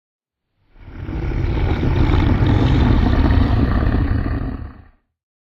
Generic growl 2
Inspired by the Monster Hunter videogame franchise. Made these sounds in Ableton Live 9. I want to get into sound design for film and games so any feedback would be appreciated.